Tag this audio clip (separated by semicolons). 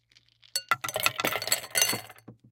Ice-cubes; Ice; Ice-dumping